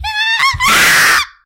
squee scream
WARNING: LOUD
a squee of worry, and then a scream as I got jumpscared
scream, squeal, scared